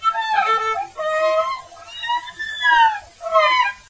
Looped elements from raw recording of doodling on a violin with a noisy laptop and cool edit 96. If you are being chased by evil, this is the sound you don't want playing in the background.